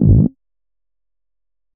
explosion beep kick game gamesound click levelUp adventure bleep sfx application startup clicks